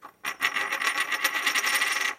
Coin Rolling 1
coin rolling on wood recorded with TascamDR07
sound, spinning, roll, money, spin, metal, dr07, tascam, rolling, coin